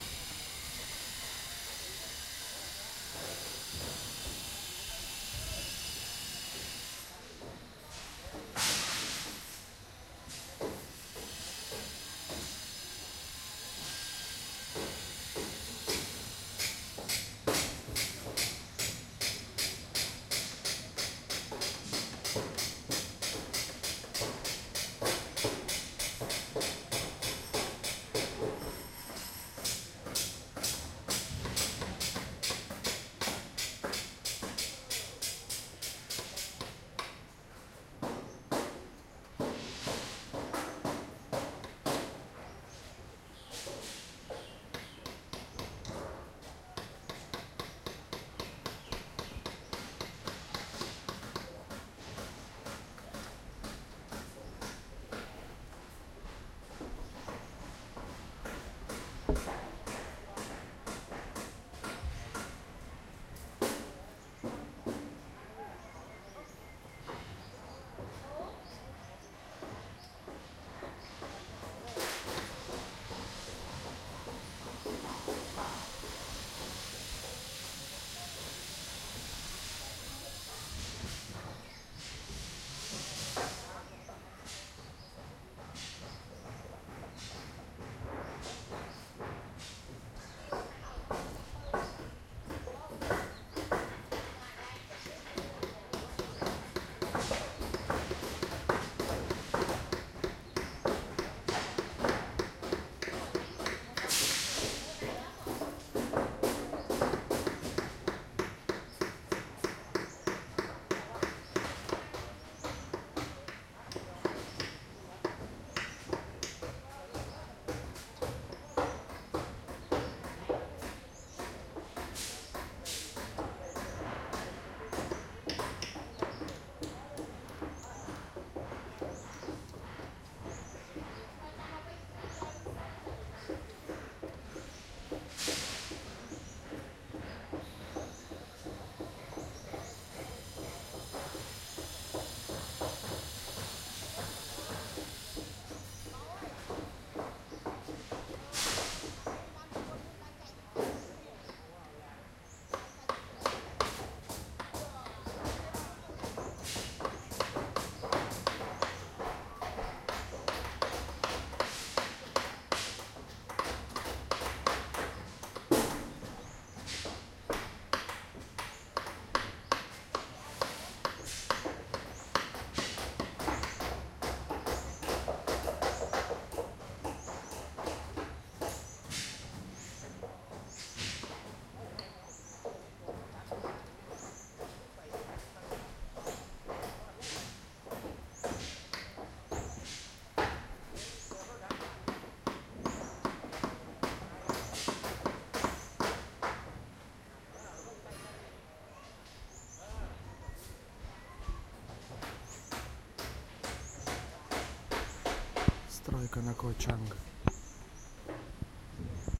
Construction Site Ambience in Thailand
Construction Site Ambience recorded in Thailand
Ambience, Construction, Site, Thailand